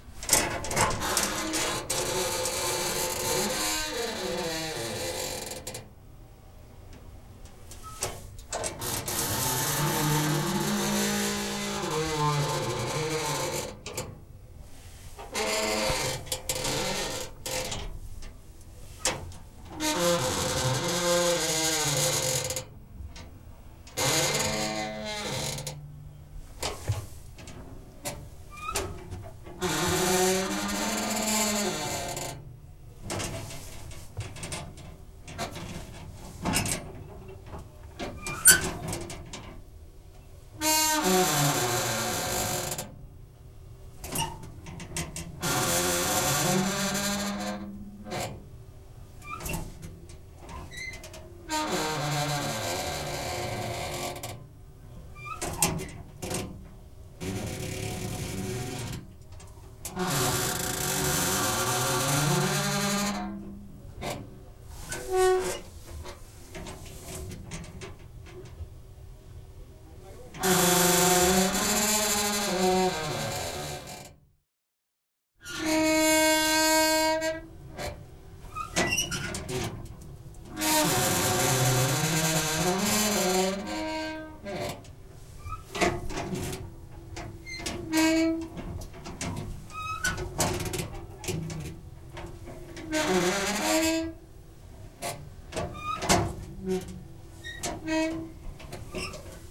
metal locker open close creaks slow groan
locker, creaks, close, groan, metal, open, slow